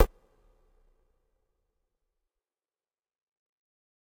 batterie 1 - weird short electronic hihat 2
BATTERIE 01 PACK is a series of mainly soft drum sounds distilled from a home recording with my zoom H4 recorder. The description of the sounds is in the name. Created with Native Instruments Battery 3 within Cubase 5.
drum-hit, mellow, percussion, short, soft